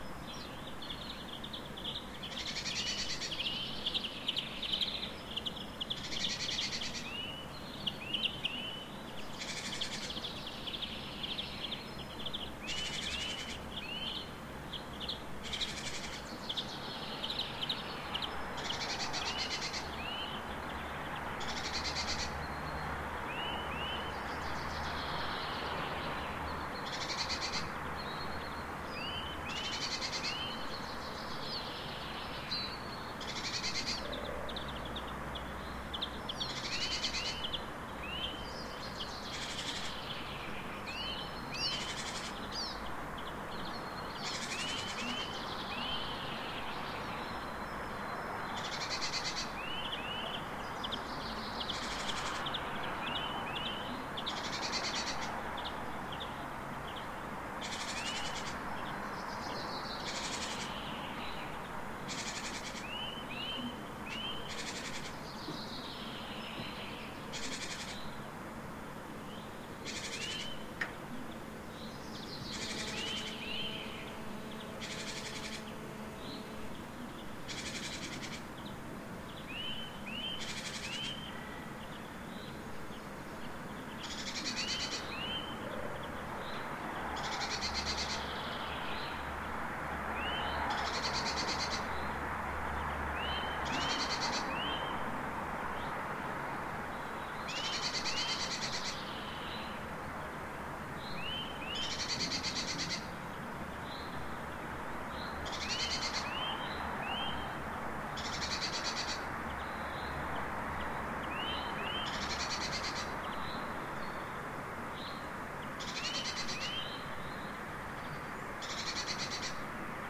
Recorded with Zoom H2 at 7:30 am. Near street-noice with several birds
morning, graz, 6channel, birds, garden